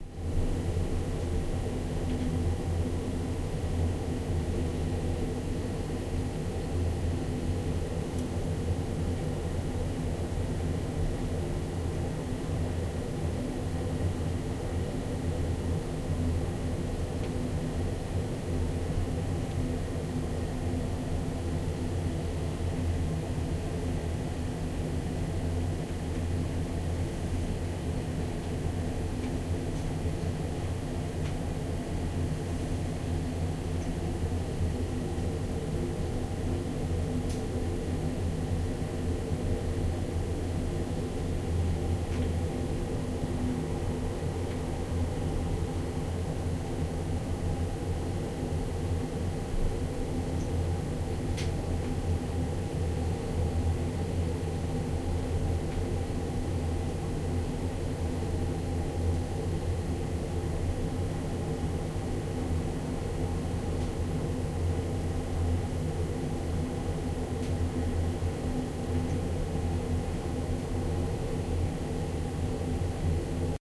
Cruiseship - inside, crew cabin daytime (air condition, low engine sound, occasional sounds from the next cabin). No background music, no distinguishable voices. Recorded with artificial head microphones using a SLR camera.